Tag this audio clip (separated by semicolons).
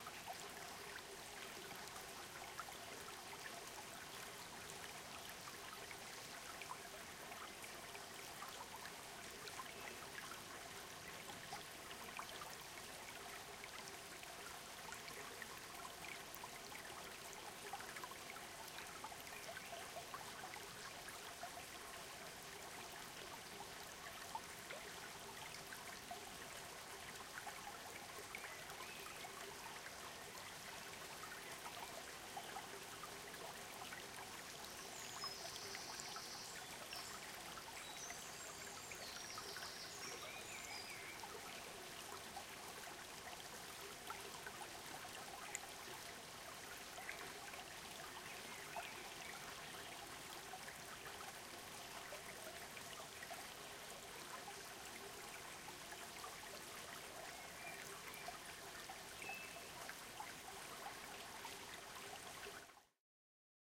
flowing; liquid; stream; water